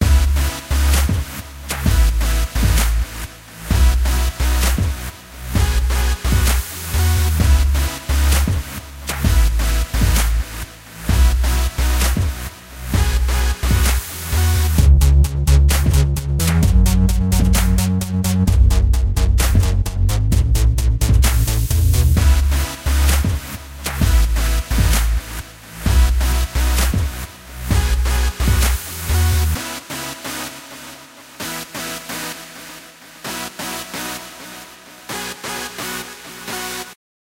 Space Flight loop